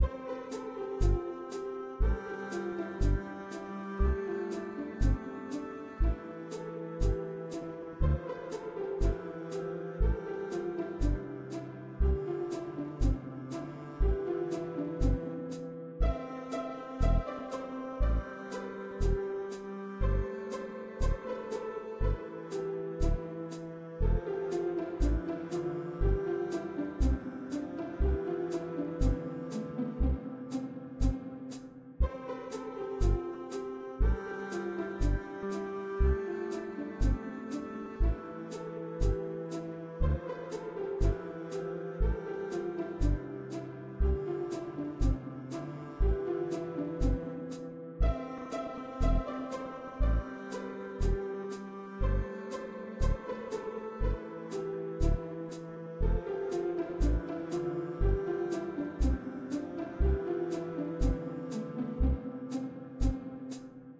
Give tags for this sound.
music
1
5
surround
selfmade

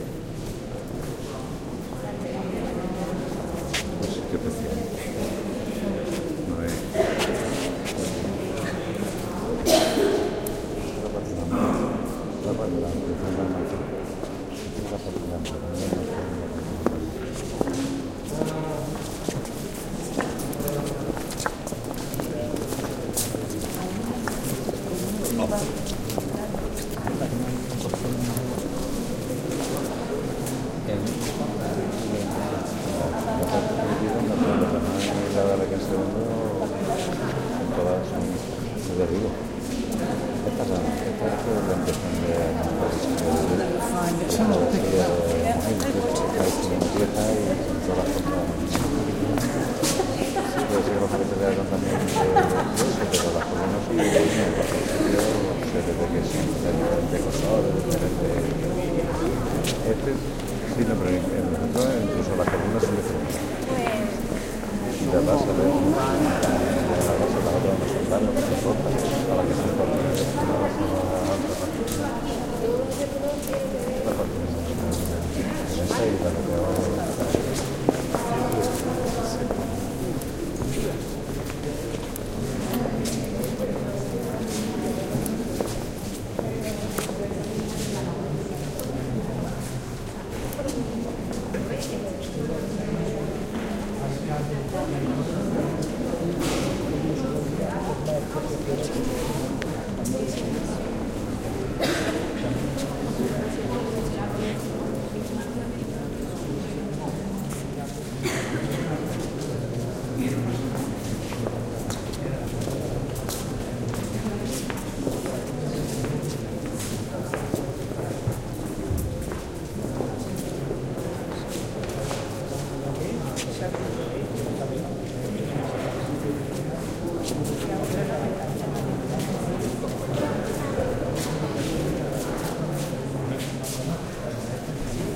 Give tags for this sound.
church
field-recording
hall
reverb
spain
talk

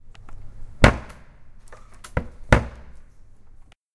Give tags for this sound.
campus-upf
door
key
lock
open

